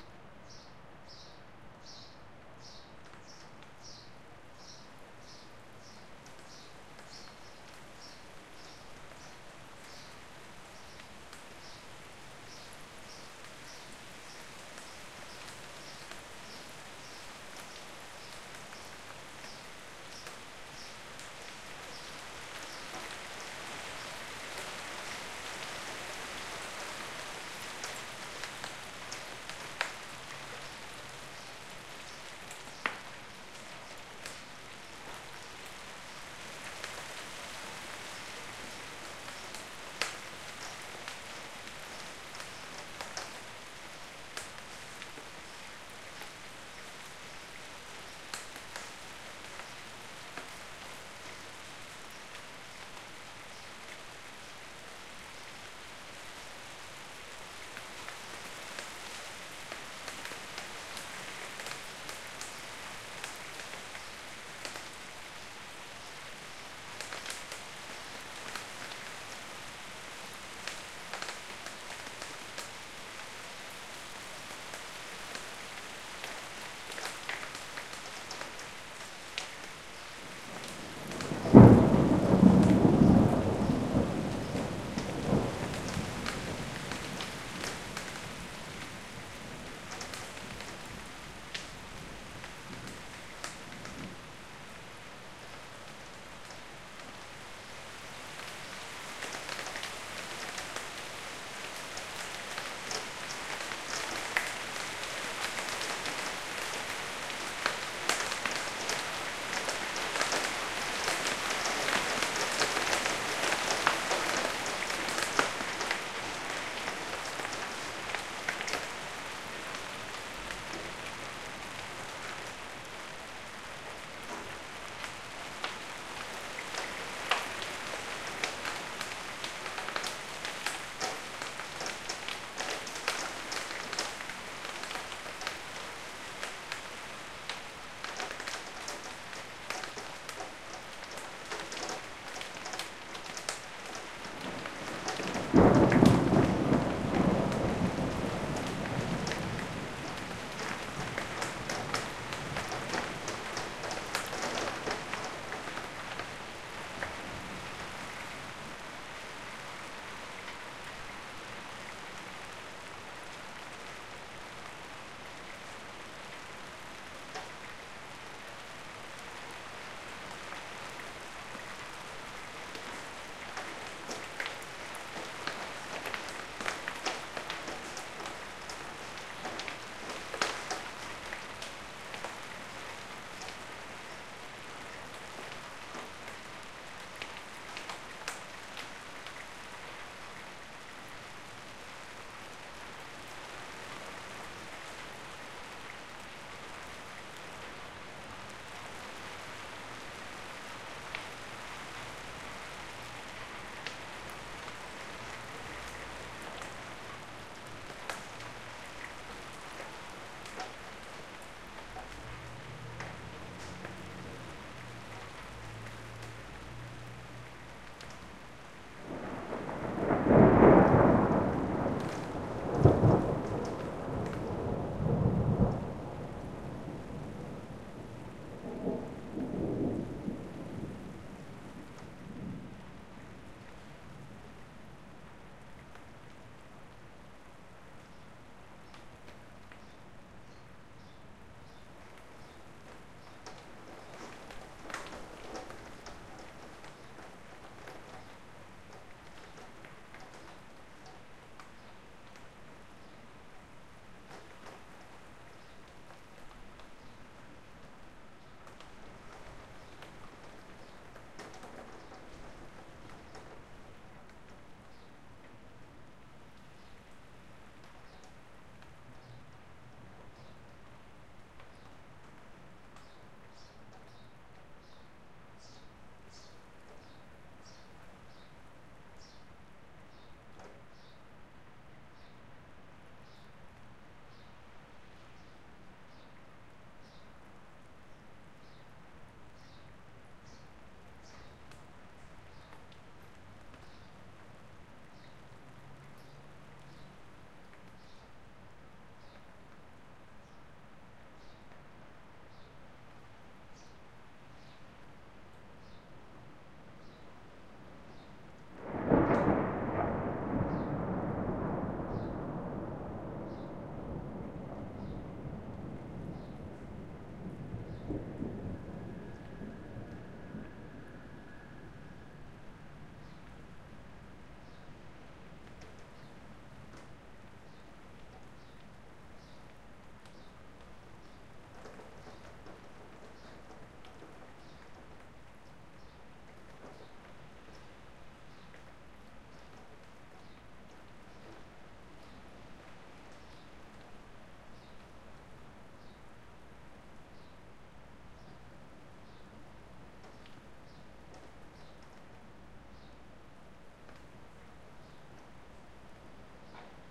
A brief but intense hail storm comes over my apartment and then goes on its way.Recorded with Zoom H4 on-board mics.